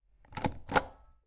Sound of fast pressing and relasing plastic self-inking stamp recorded using stereo mid-side technique on Zoom H4n and external DPA 4006 microphone